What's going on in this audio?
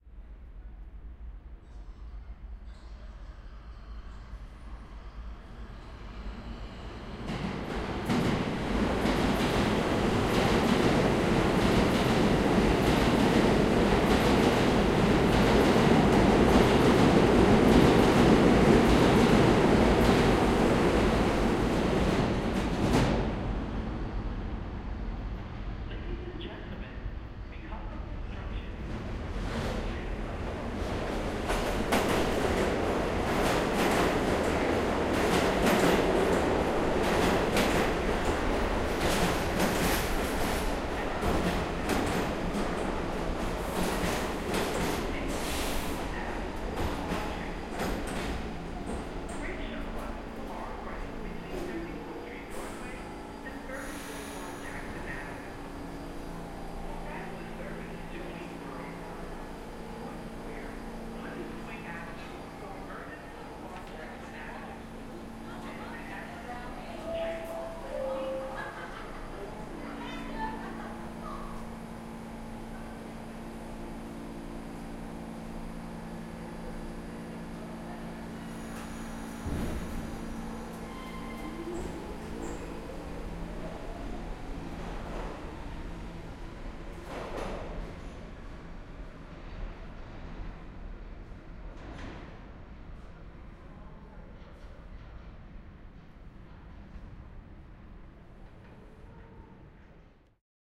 New York City NYC R Train arrives and leaves
I think this was recorded at the 28th Street Station in Manhattan
MTA, metro